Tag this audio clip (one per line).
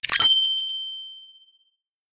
bling
cash
cashier
change
checkout
coins
gold
money
shop
shopping
store
till
win